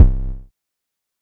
C Kicks - Semi Short C Kick
Square wave > Filter > Pitch Mod > Hard Compression for Transients
trap, hard, Punch